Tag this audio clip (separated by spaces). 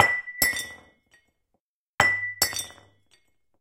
breaking dropping falling floor glass glasses ortf xy